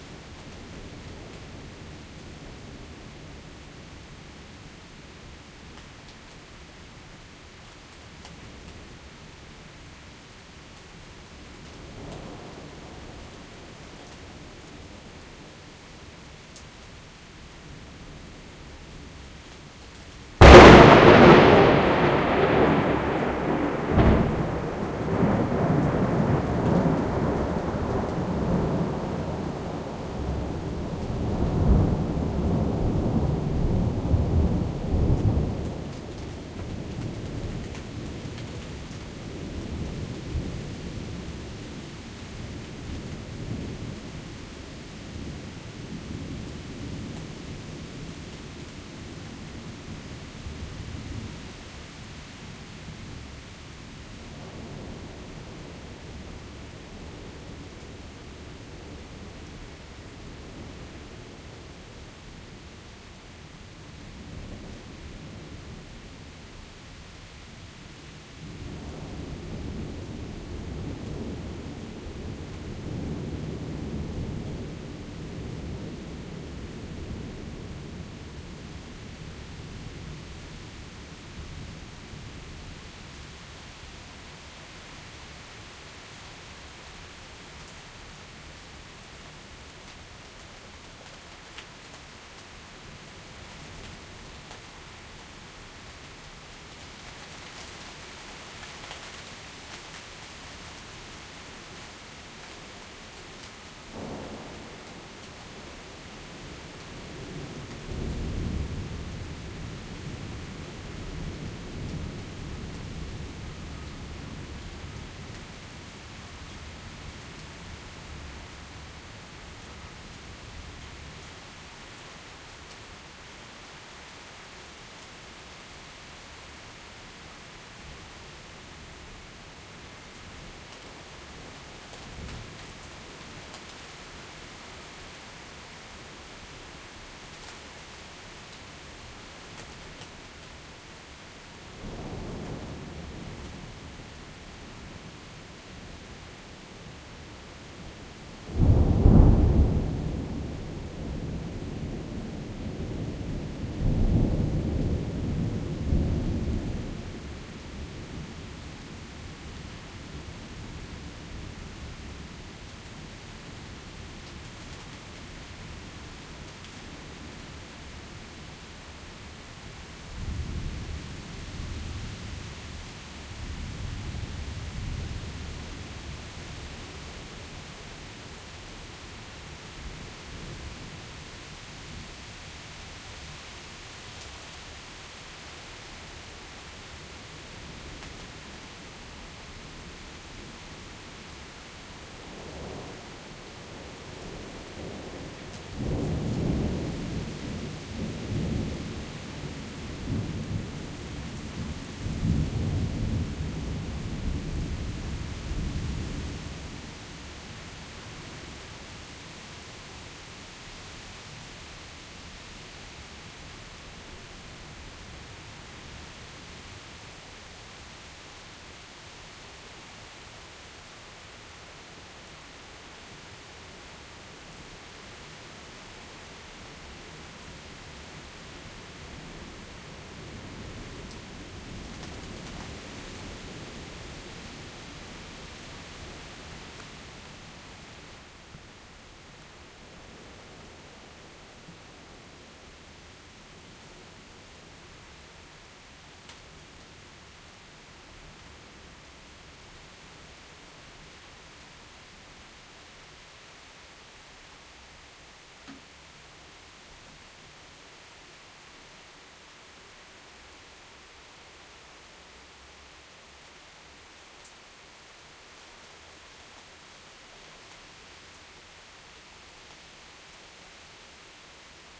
Loudest Thunderclap ever
A massive Thunderclap next to me.
big, donnerschlag, extreme, gewitter, heavy, krach, laut, loud, loudest, massive, rain, real, regen, schlag, storm, sturm, Thunder, thunderstorm